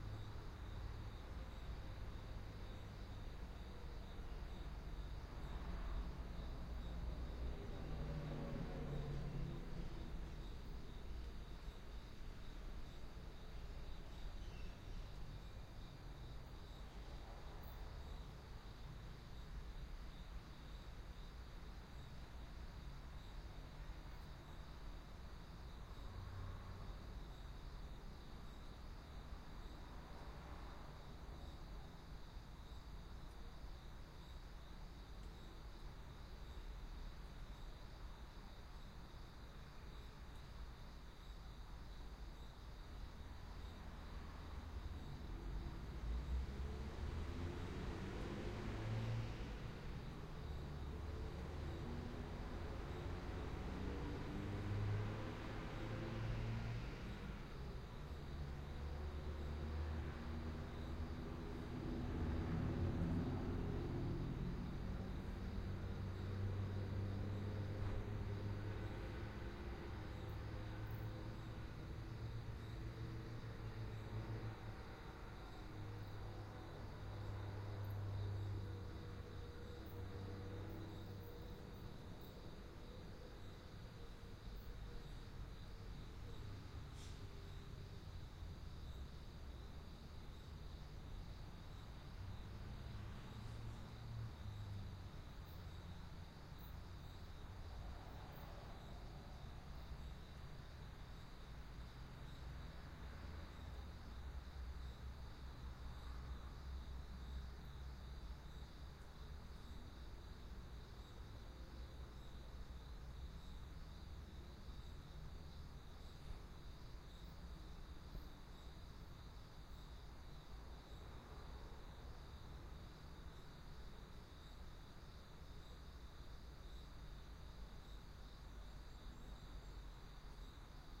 Recorded with: Zoom H6 (XY Capsule)
Midnight ambience recording outside my room at a house in the urban parts of Pretoria.
ambiance, ambience, ambient, atmosphere, field-recording, midnight, urban